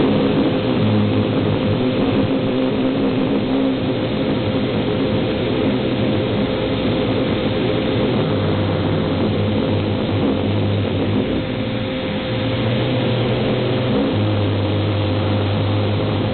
Airplane Engine Sound 2
An airplane engine sound I recorded in February 2010 by sucking my shirt into a vaccum cleaner. I used this custom engine sound in Aces High II.
aircraft-engine, airplane, airplane-engine-loop